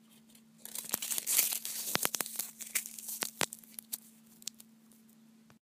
Crusing Tin Foil
Recorded close to the mic. Small piece of tin being crumpled.
aluminum, close, crushing, foil, high, metal, metallic, pitch, tin